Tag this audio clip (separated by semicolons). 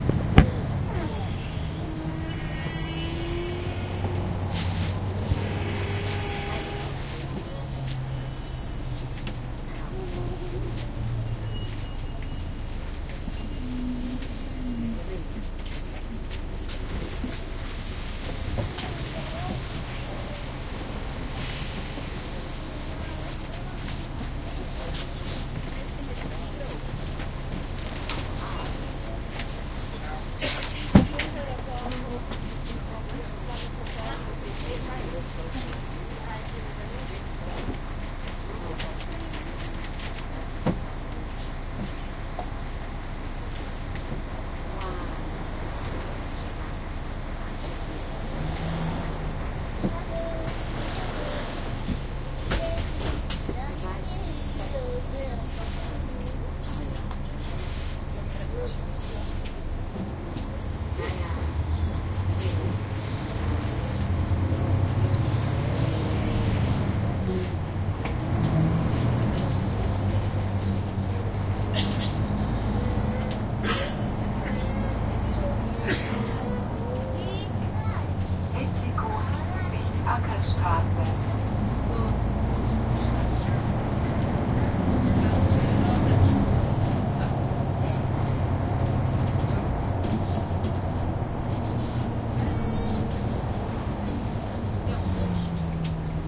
ambience; bus; field-recording; public; transportation